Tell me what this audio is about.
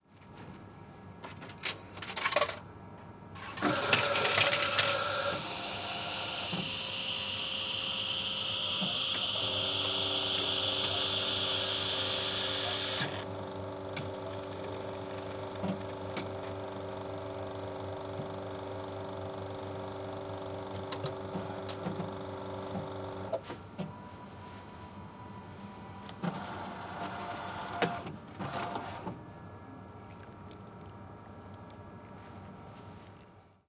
Paid Coffee machine 2
Getting a cup of coffe from a paid coffe machine.
coffee, coin, cups, hydraulics, insert, machine, paid